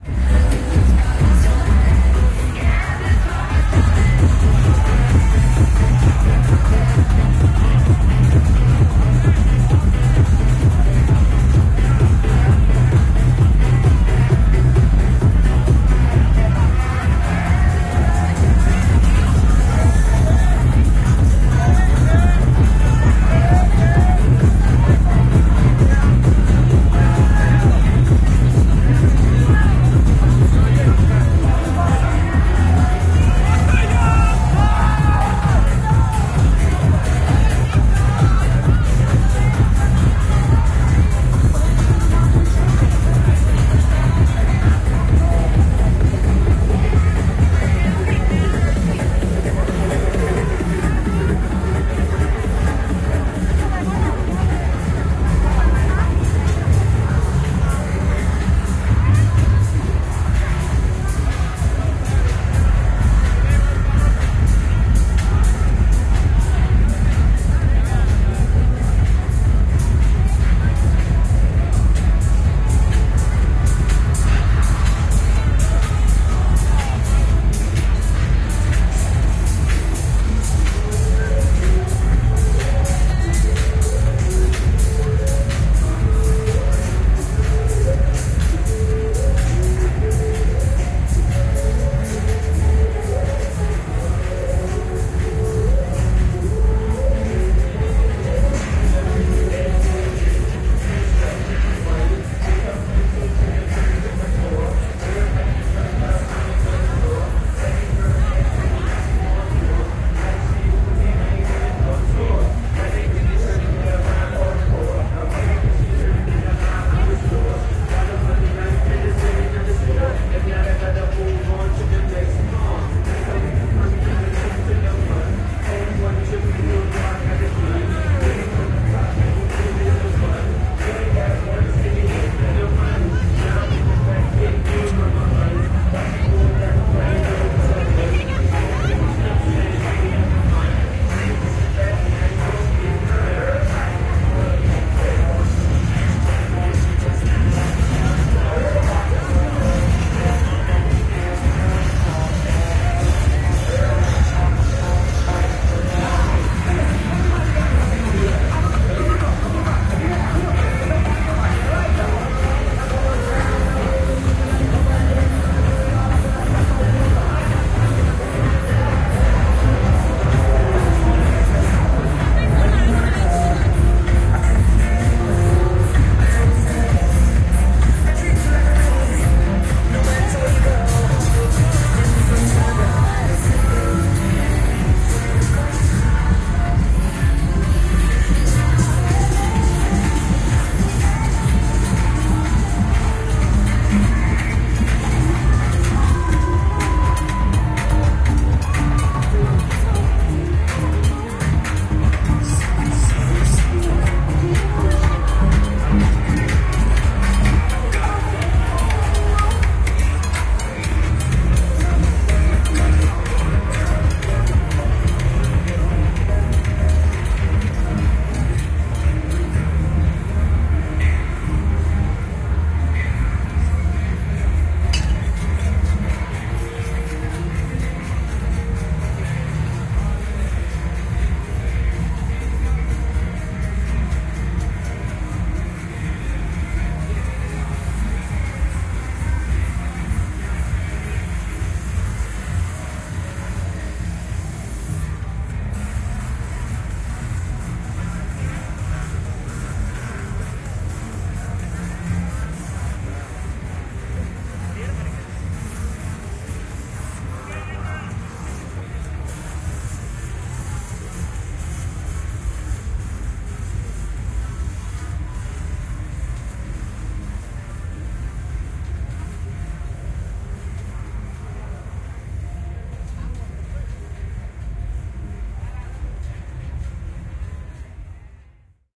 SEA 2 Thailand, Bangkok, Khaosan Road, Walk through, Music Chaos
Bangkok / Thailand, Khaosan Road, Walkthrough
Party on the street on weekend, Music chaos from multiple Bars and Clubs, getting more quiet towards the end
Date / Time: 2016, Dec. 30 / 1h22m
field-recording, party, khaosan, streetlife, bangkok, thailand